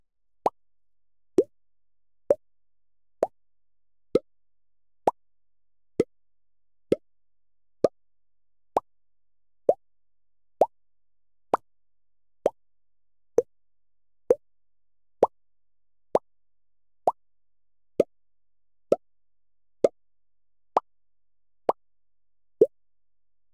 Blop Mouth
Funny, Blop, Cartoon